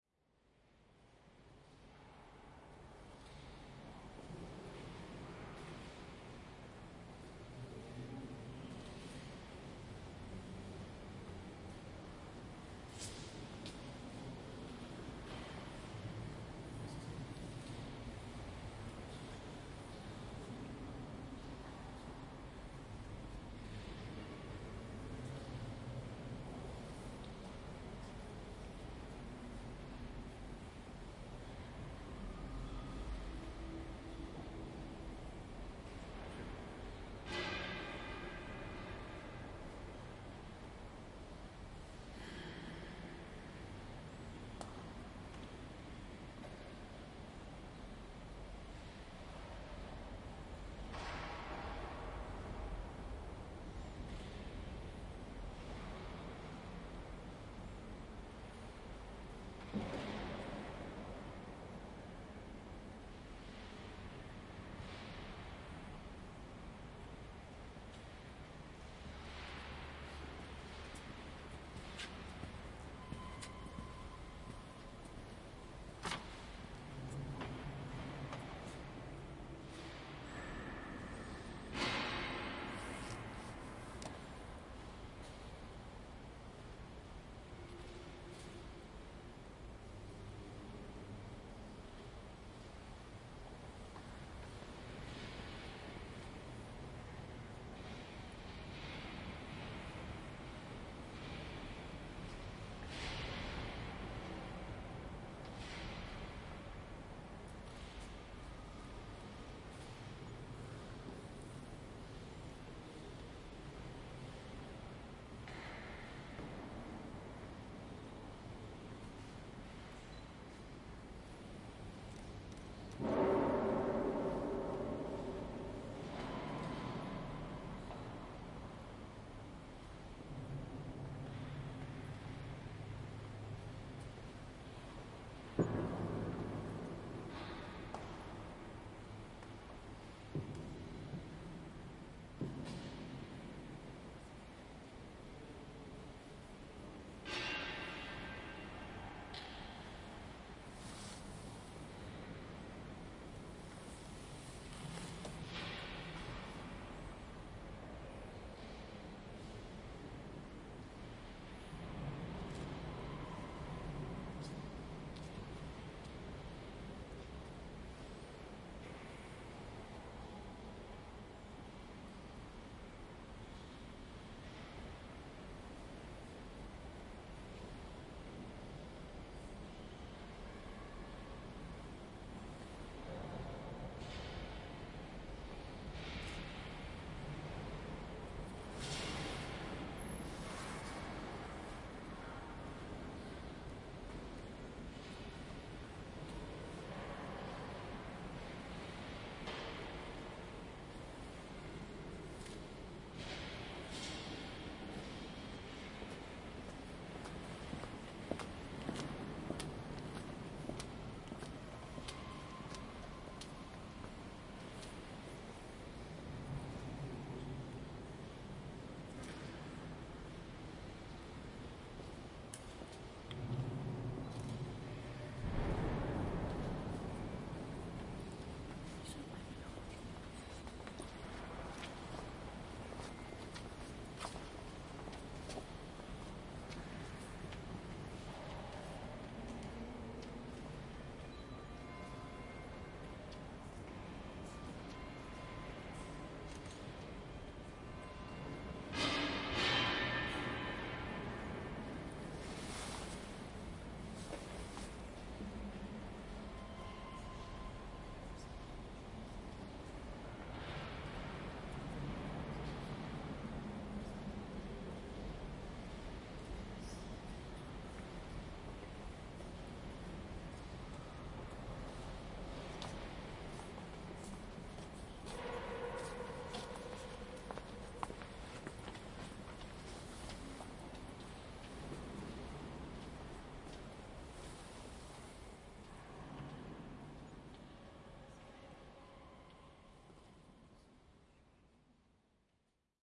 AMB Dans une grande eglise
Ambiance dans une grande église. Calme / Ambience in a big church. Calm
Vide, Ambiance, Church, Eglise